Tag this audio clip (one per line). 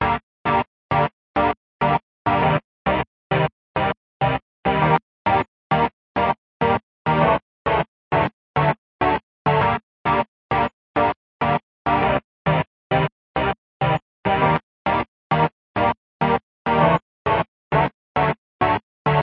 100-bpm
chops
chorus
cinnamon
cut
down
electronic
EQ
low
minor
muffled
phased
rhythmic
staccato
synth
vintage